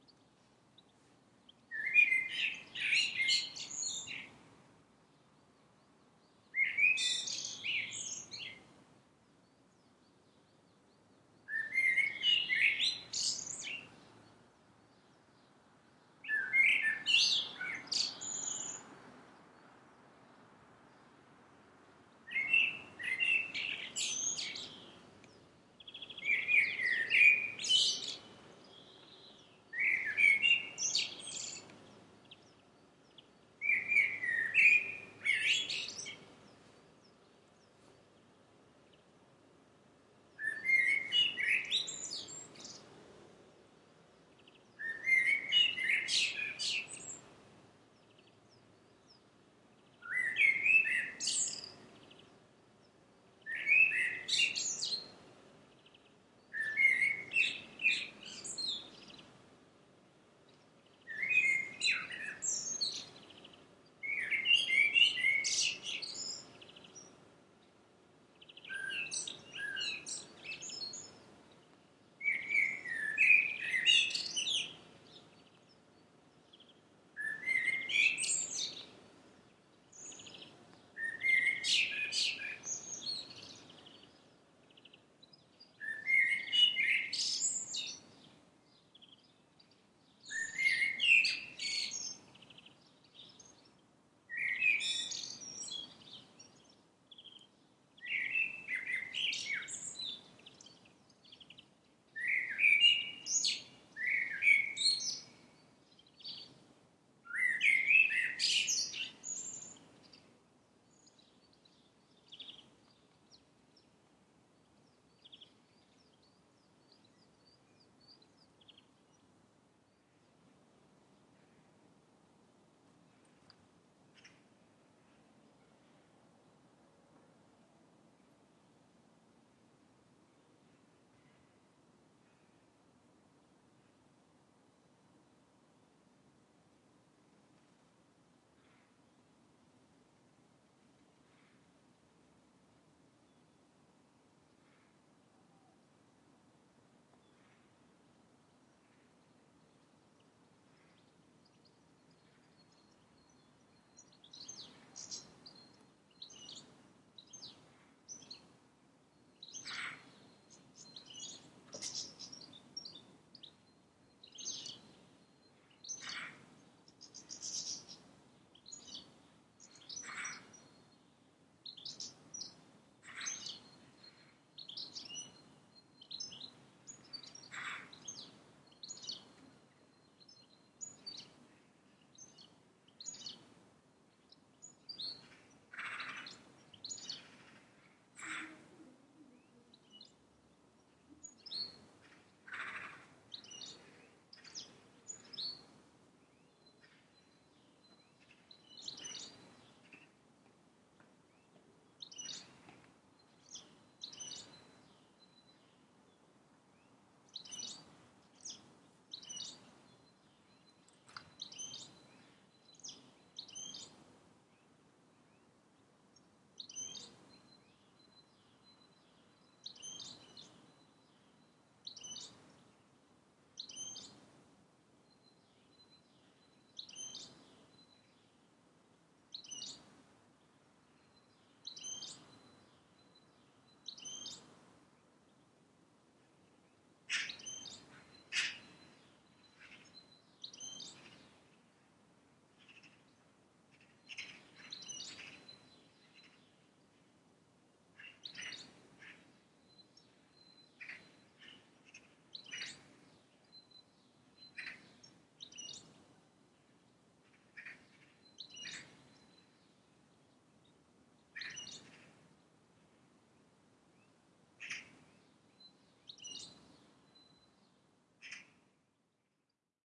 Calm Place: Birds Singing at Beytepe Campus Ankara,Turkey
Recorded at morning time in Hacettepe University, Beytepe Campus. Ankara, Turkey. Recorded with Electron i5 condenser mic via using by 2010 Mid Macbook Pro i7, Adobe Premiere Pro CS6.